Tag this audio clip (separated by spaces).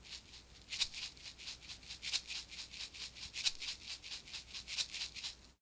Jordan-Mills mojomills lofi